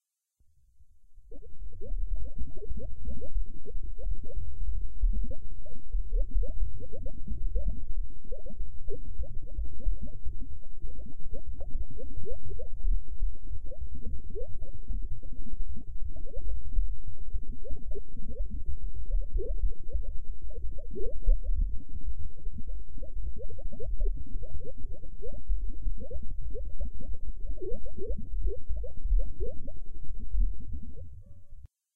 A series of sounds made using the wonderful filters from FabFilter Twin 2 and which I have layered and put together using Audicity. These samples remind me of deep bubbling water or simmering food cooking away in a pot or when as a kid blowing air into your drink through a straw and getting told off by your parents for making inappropriate noises. I have uploaded the different files for these and even the layered sample. I hope you like.
Cooking-pot, Bubbles, Water, Boiling, Cooking